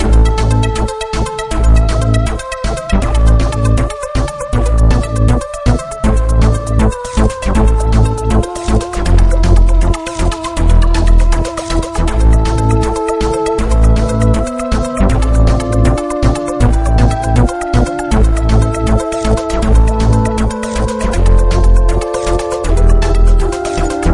Looped game menu music that I made in ableton but will probably not use
electronic, loop, music, synth, theremin